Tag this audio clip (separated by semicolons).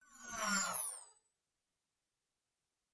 scifi; future; effect; digital